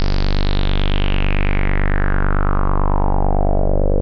Multisamples created with Subsynth.
multisample, square, synth